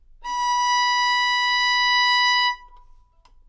Part of the Good-sounds dataset of monophonic instrumental sounds.
instrument::violin
note::B
octave::5
midi note::71
good-sounds-id::3632